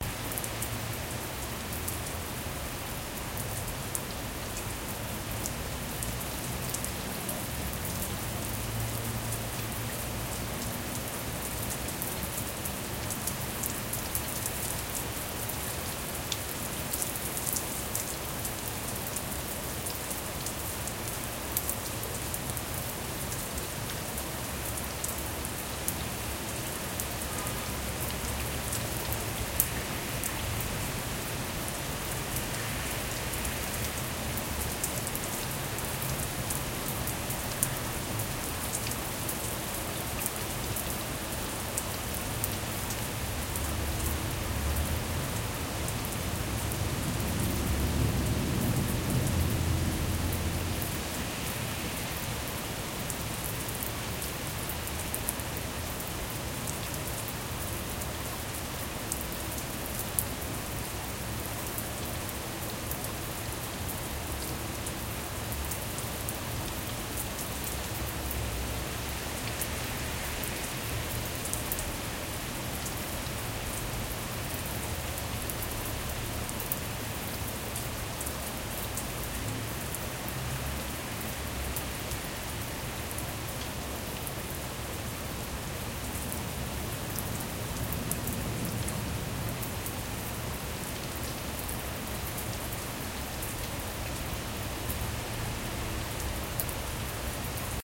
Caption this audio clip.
Hearing rain
Hearing the sound of rain in a room.
raindrops, rain, house, raining, field-recording, rainfall, room, shower, weather